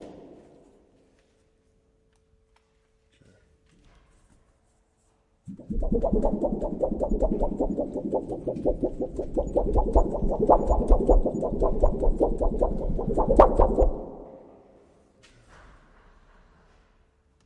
Flapping a piece of sheet metal in front of a mic!
echoing, fluttering